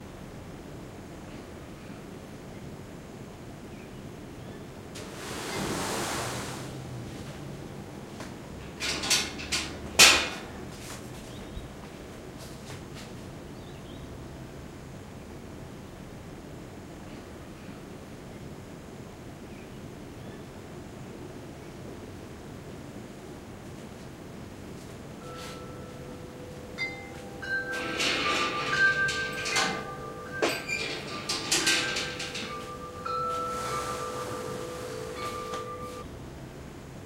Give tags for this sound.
birds
bolts
chimes
clanging
door
gate
industrial
loud
sliding-door
studio

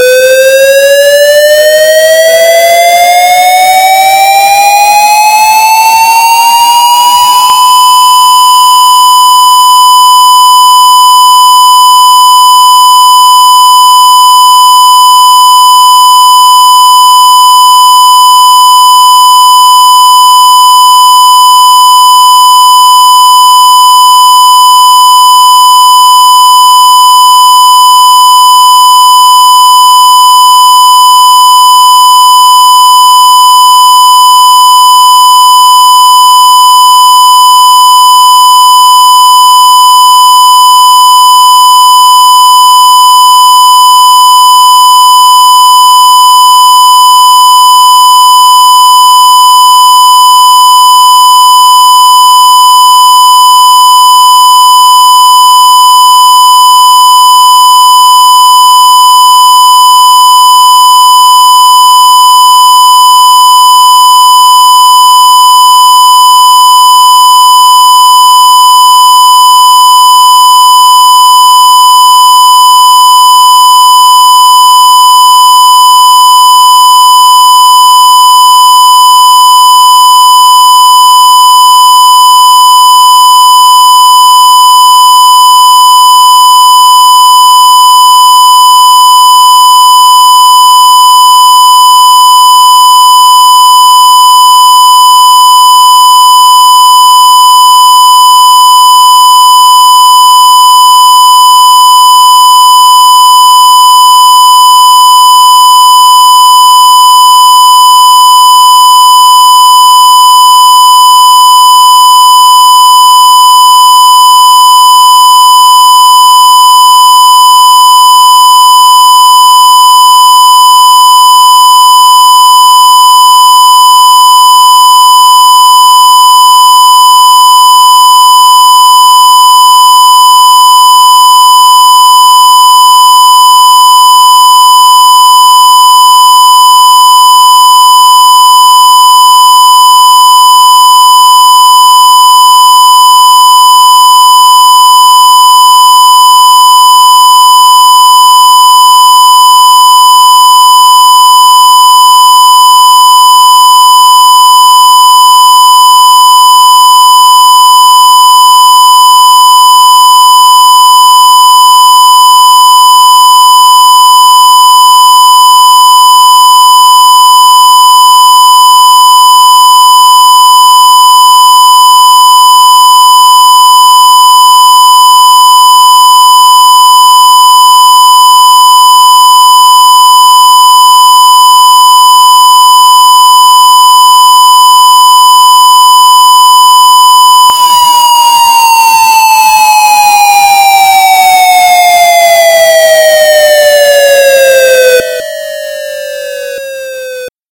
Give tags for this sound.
ati
nashville
siren
synthesized
tn

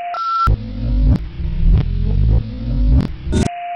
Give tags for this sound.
beeps
clicks
sample
static

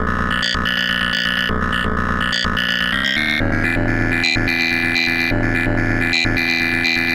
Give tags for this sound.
hardcore-mono
sound-design
electronic
granular
modulation
underground

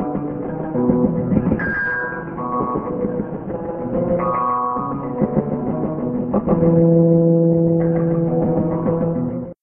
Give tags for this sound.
live
guitar
lo-fi

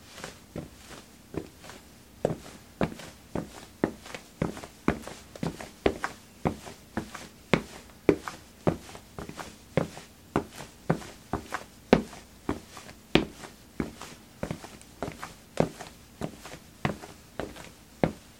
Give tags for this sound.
tile
heavy
footstep
feet
boots
walking
walk
footsteps

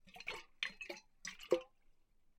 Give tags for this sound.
bottle
drink
liquid
sloshing
water

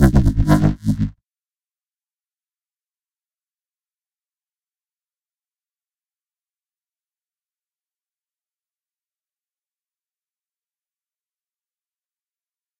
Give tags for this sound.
bass
resampling
wobble

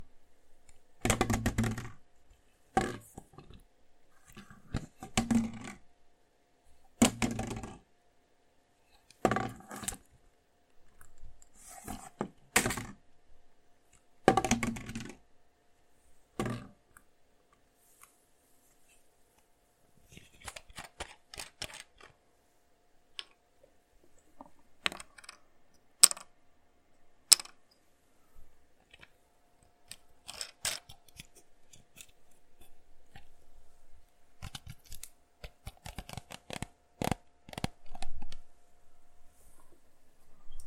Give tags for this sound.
jerrycan
screw
recording
Bottle
hit

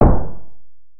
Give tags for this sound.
procedural
psii
synthesized
collision
bang
impact
smash
hollow

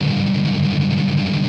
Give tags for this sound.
160bpm
power-chord
guitar
g
drop-d
strumming
loop
distortion
muted
les-paul

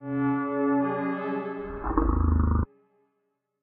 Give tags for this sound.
synth
scary

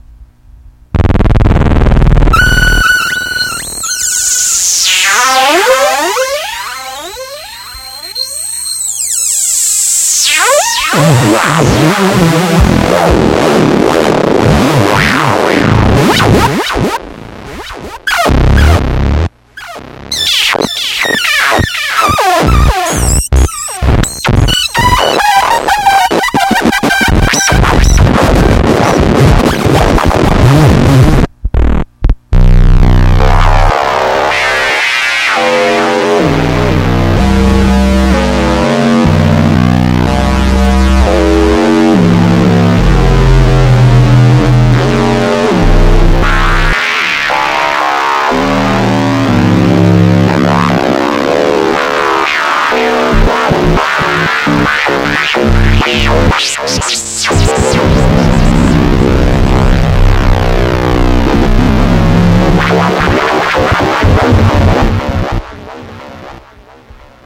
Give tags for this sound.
processed
noisy
kaoss
musik
weird

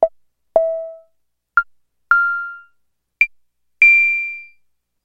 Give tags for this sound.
analog
woodblock
korg
poly
mono

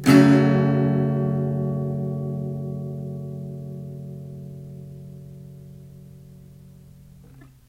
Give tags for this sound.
acoustic
chord
strummed
guitar
small
scale

